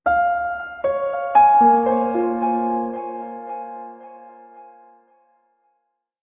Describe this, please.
Two voiced little phrase, part of Piano moods pack.
calm, mellow, mood, phrase, piano, reverb